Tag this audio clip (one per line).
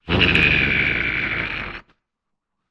creature
ghost
ghoul
horror
monster
scarecrow
zombie